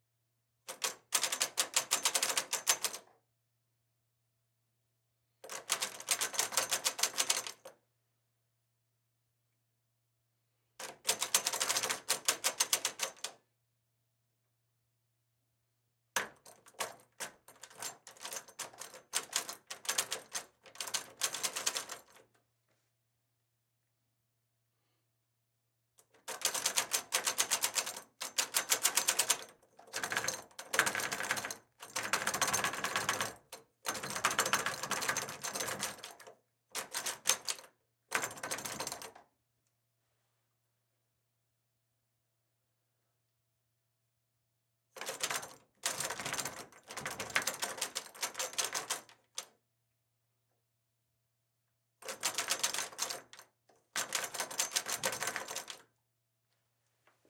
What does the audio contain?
quick locked metal door handle sound. As in - someone trying to open a locked door handle (interior home like a bedroom).